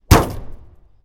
sons cotxe capot 2011-10-19
car, field-recording, sound